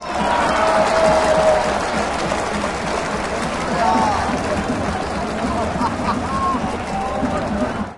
nagoya-baseballregion 18
Nagoya Dome 14.07.2013, baseball match Dragons vs Giants. Recorded with internal mics of a Sony PCM-M10
Soundscape, Crowd, Ambient, Baseball